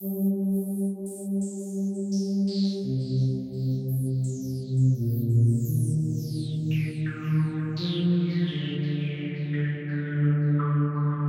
soft pad line with synth delay.
strings, techno